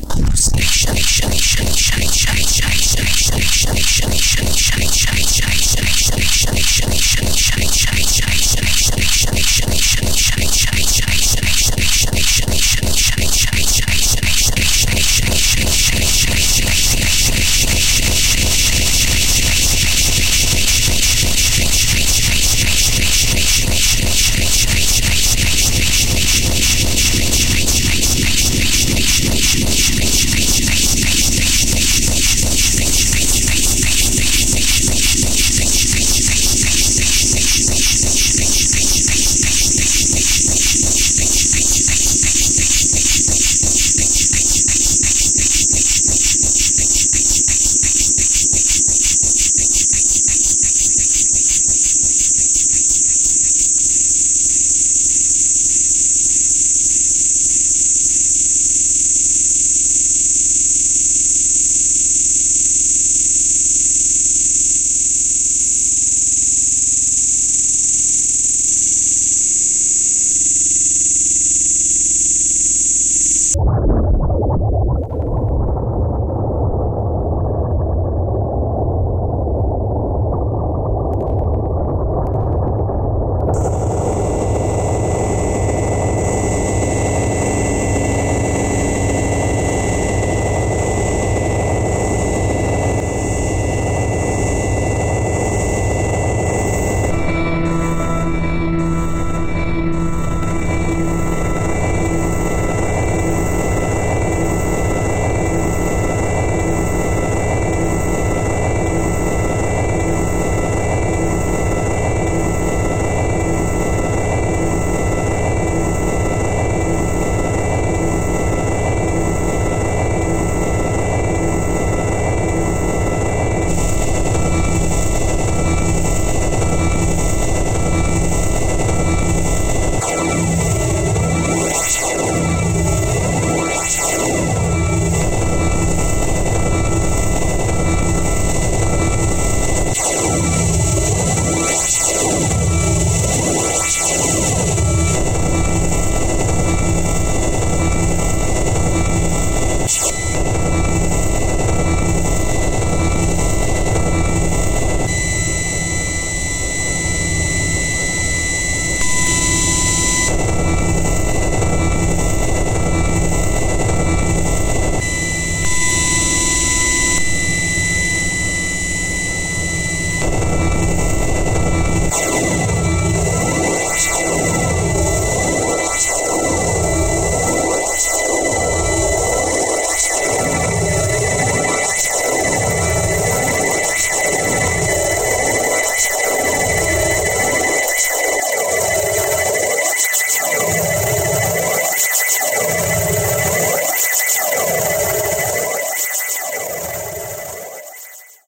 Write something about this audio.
I spoke the word "hallucination" into a microphone, and applied a large amount of processing to it, mostly echo and flanging effects.
distortion, echo, flanging, noise